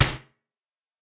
Rock Jump
Recorded and edited in Reason 7, using an impact of stomping on the floor. Recorded with Sterling Audio SP50.